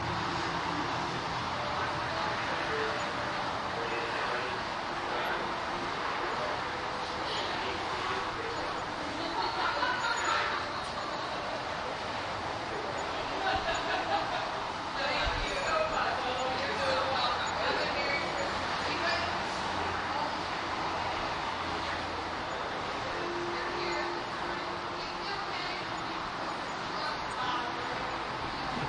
Probably Brandsmart shopping last minute before Christmas with DS-40